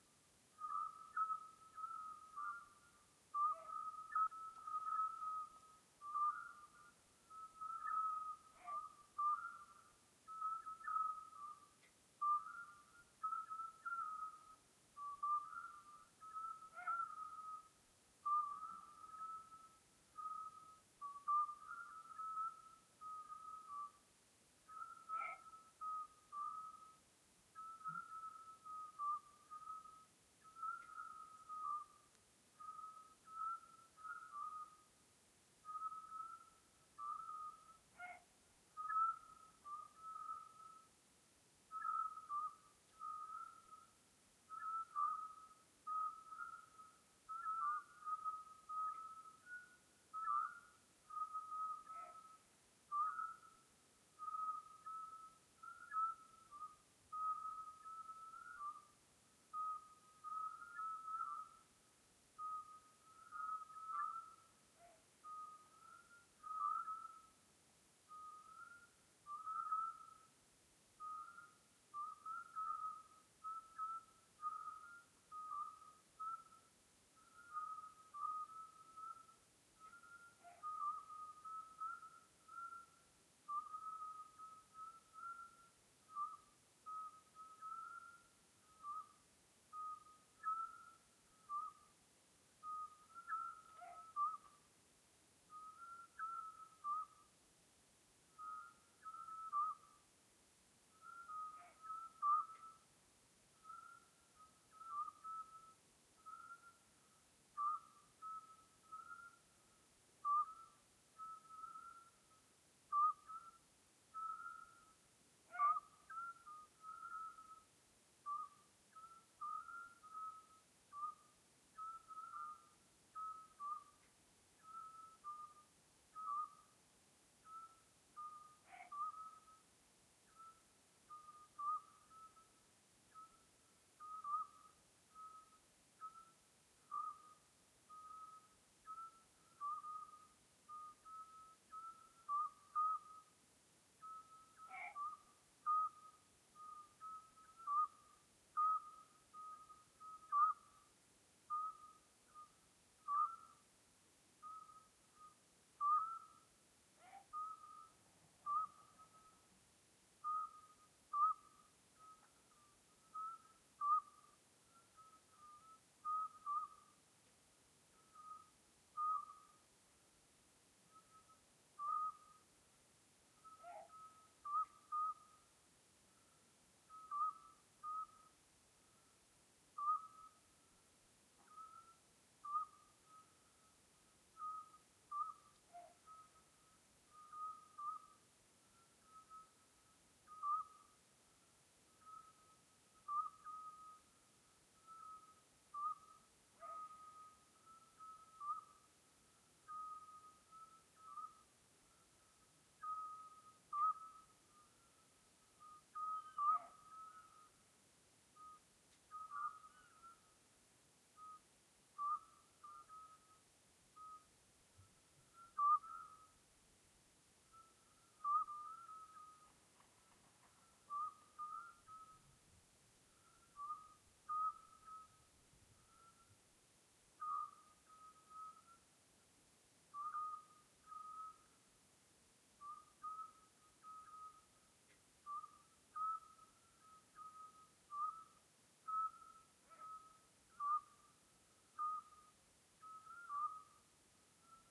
Several Scop Owls (Otus scops) singing in a perfectly still night. Every now and then a creature unknown to me barks.
Sennheiser MKH60 + MKH30 into Shure FP24 preamp, Edirol R09 recorder